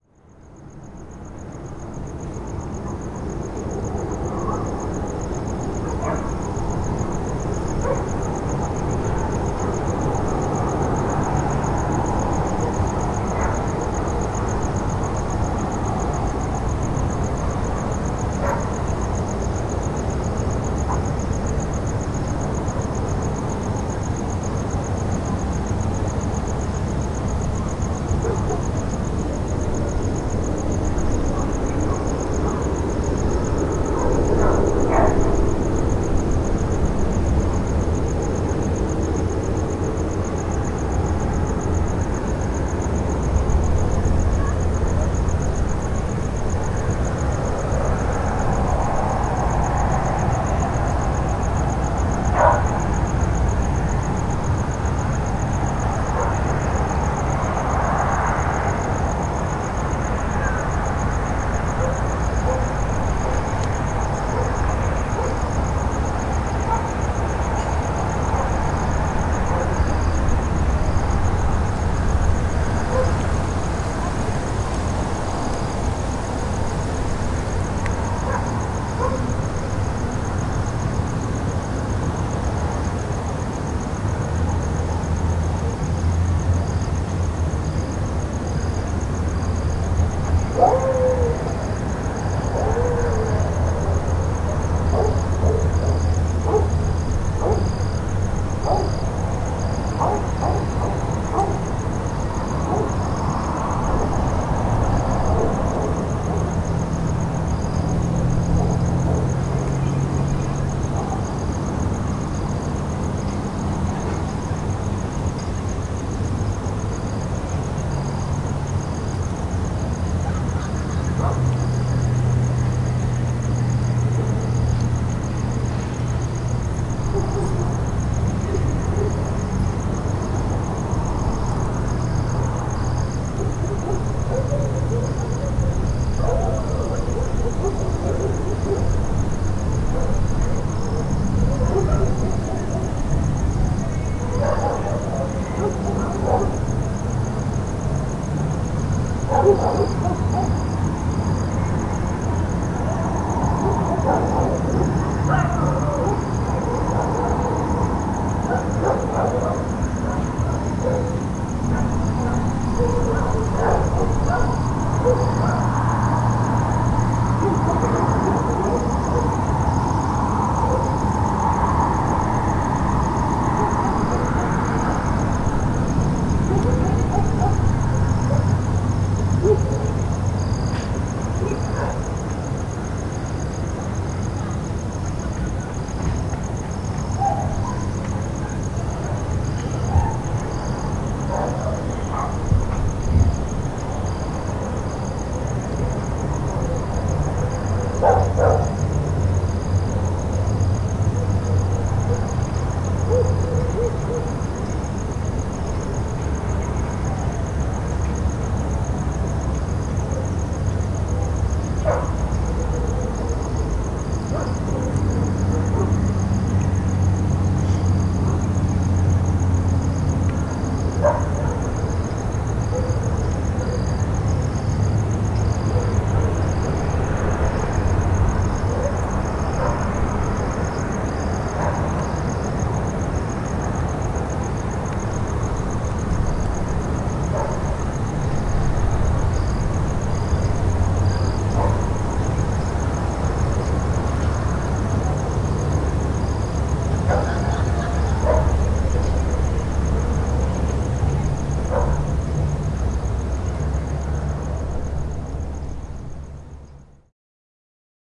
The title says it, Night Atmos with distant traffic, crickets and dogs barking.
Recorded in a MS stereo set up onto a Zoom F4. I have boosted the levels considerably in post.
evening, ambience, night, field-recording, barking, crickets, atmosphere, suburban, town, summer, nature, dogs, distant-traffic, insect, dogs-barking, atmos